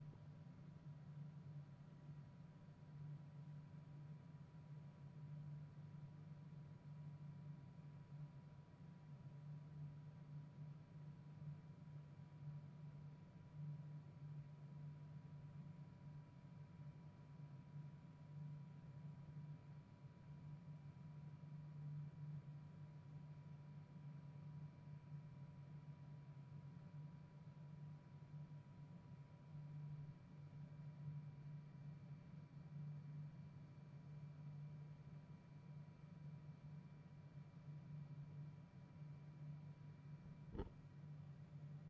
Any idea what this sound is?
This is the atmospheric tone of an empty computer room with macbooks running and airconditioning and vents channeling sounds from other rooms.